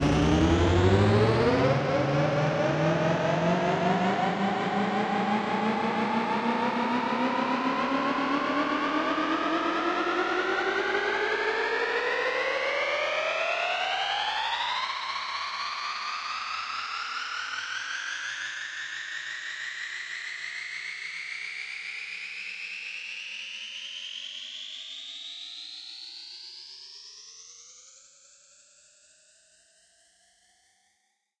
Fade-in, Pitch up
Pitched up long fade-in effect, space ship flying style.
effect, fade-in, pitch, soundesign, spaceship